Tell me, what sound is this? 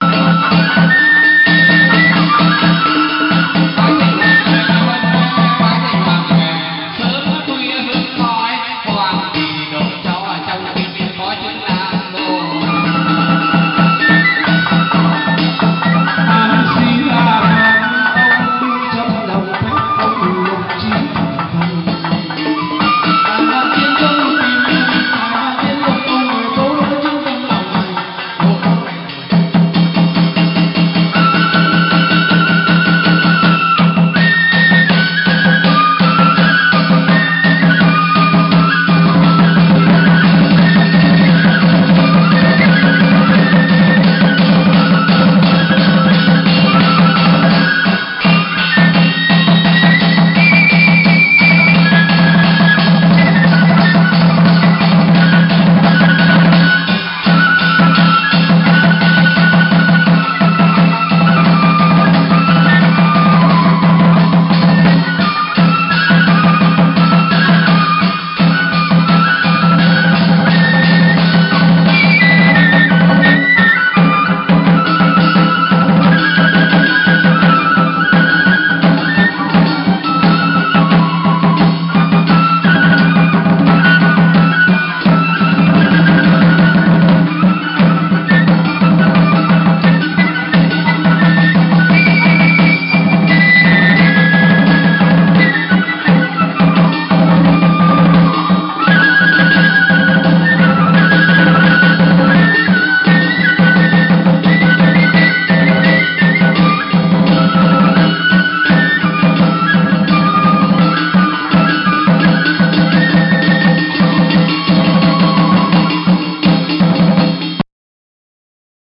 Chau Van
Chầu văn musicians during a lên đồng ritual, Hanoi 2012. Audio: Andrea Lauser
Chau, musicians, Van, Len, Dong